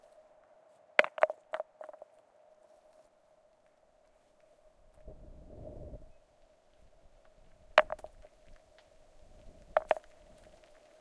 Rock with EQ
A recording of a small rock hitting other small rocks with the Zoom H6 with the included XY mic. The EQ has been changed to try to make it sound more mechanical.
field-recording, nature, rocks, stereo